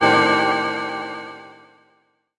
PPG 008 Dissonant Space Organ G#1
This sample is part of the "PPG
MULTISAMPLE 008 Dissonant Space Organ" sample pack. A short dissonant
chord with a sound that is similar to that or an organ. In the sample
pack there are 16 samples evenly spread across 5 octaves (C1 till C6).
The note in the sample name (C, E or G#) does not indicate the pitch of
the sound but the key on my keyboard. The sound was created on the PPG VSTi. After that normalising and fades where applied within Cubase SX.
chord, dissonant, multisample, organ, ppg